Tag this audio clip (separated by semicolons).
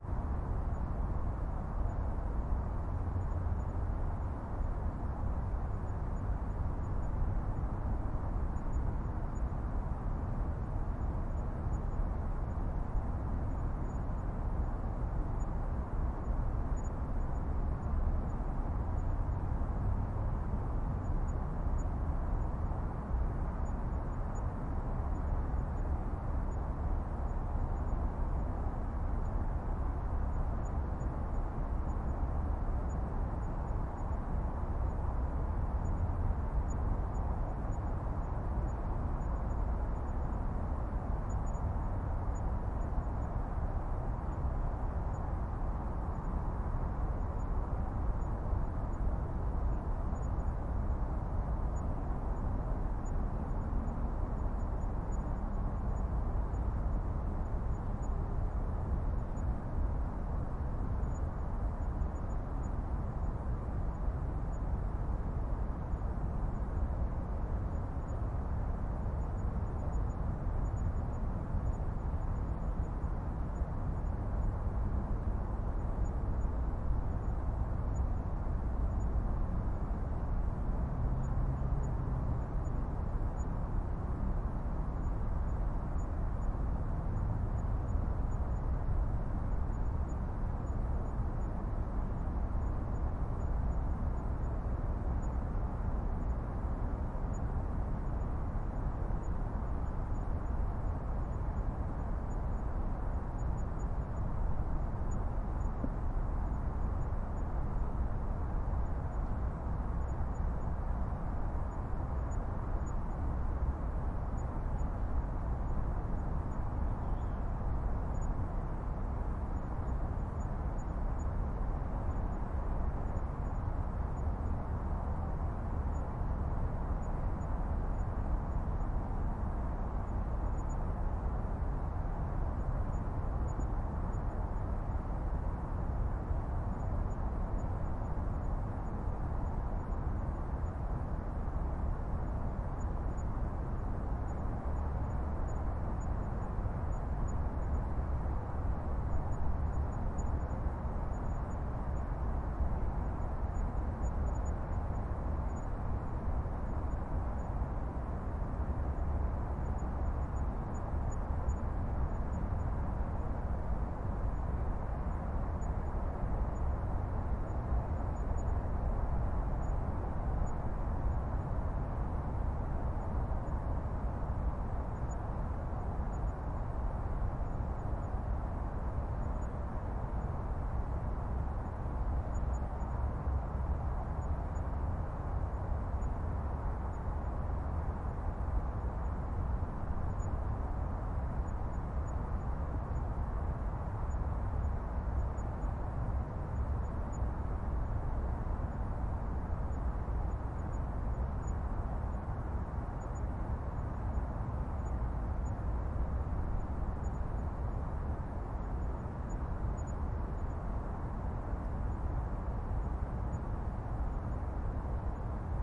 Moscow; Night; quiet